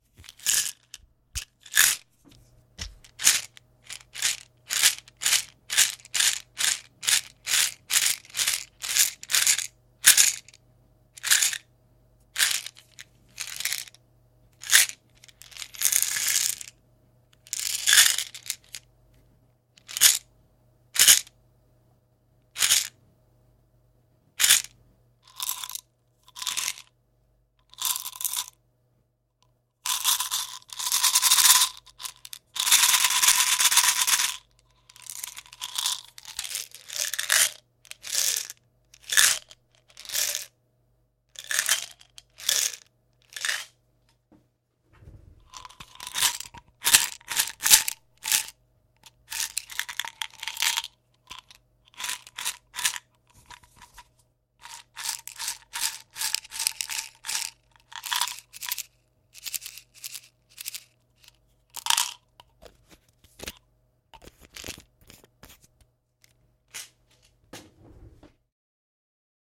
medicine bottle Handing
medicant, bottle, handing